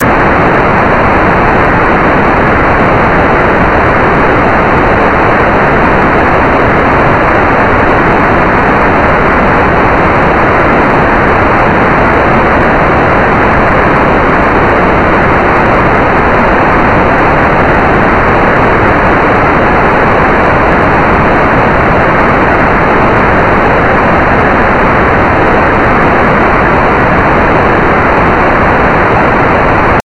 22 LFNoise2 3200Hz
This kind of noise generates sinusoidally interpolated random values at a certain frequency. In this example the frequency is 3200Hz.The algorithm for this noise was created two years ago by myself in C++, as an imitation of noise generators in SuperCollider 2.
low, noise, interpolated, sinusoidal, frequency